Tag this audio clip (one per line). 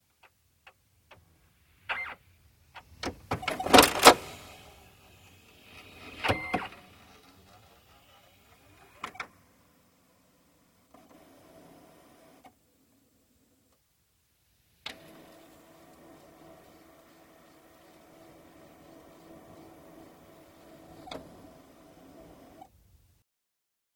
computer
electronic
machine
mechanical
motor
noise
printer
scanner
servo
switch-on
whine